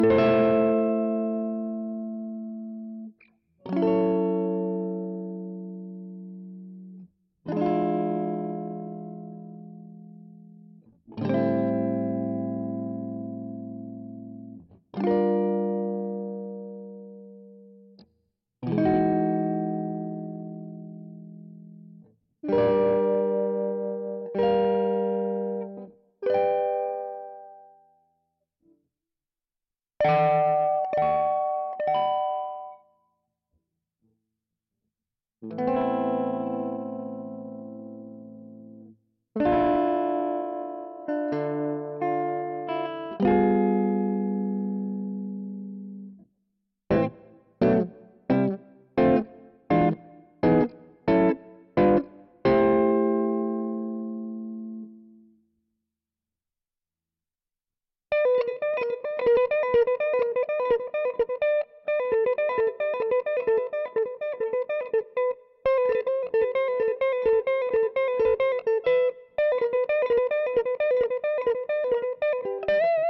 House Guitar Loop 2
Funky guitar loop
Funky, Loop